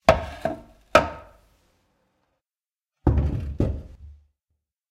Piece of wood being taken.
Recorded with Oktava-102 microphone and Behringer UB1202 mixer.
stab, lumber, wood, craft, rustle